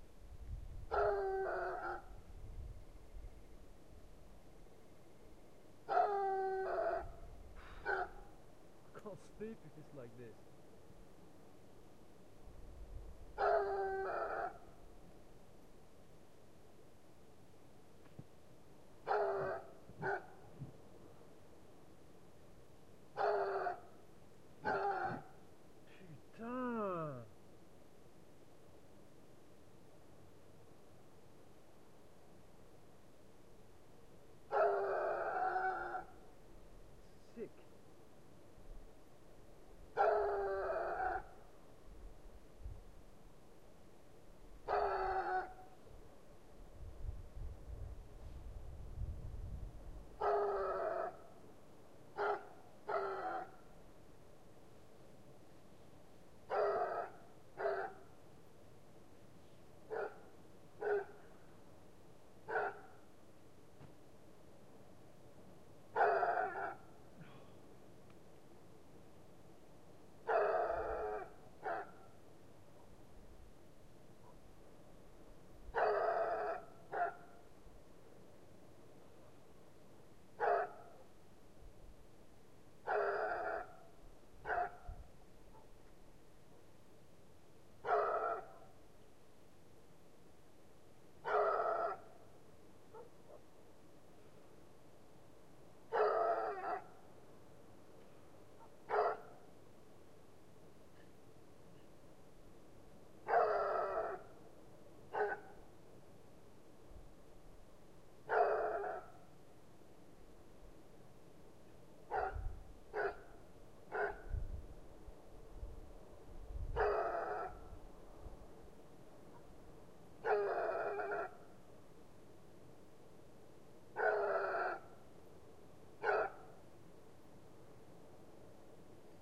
howling dog
A really scary sounding dog barking/howling into the night. Not a very nice sound. Also there is an upset French guy complaining about the dog.
Recorded with the Zoom H4 on-board mics.